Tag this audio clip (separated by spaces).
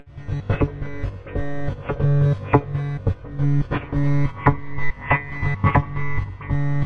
ambient background d dark dee-m drastic ey glitch harsh idm m noise pressy processed soundscape virtual